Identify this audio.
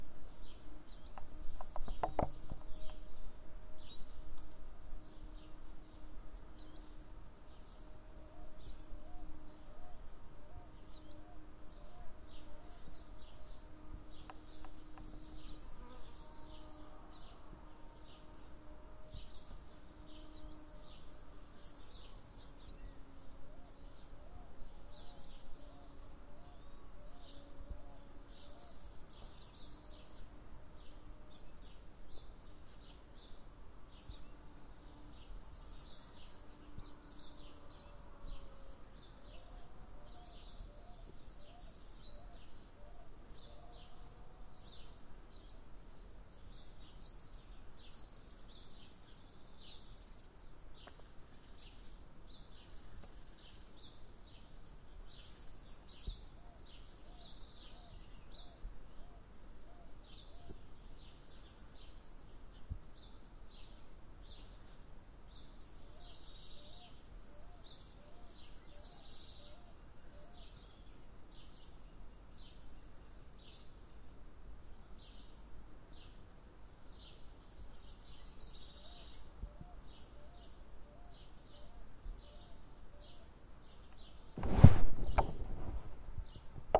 Campo pajaros sur de Chile
recorded in the south of chile.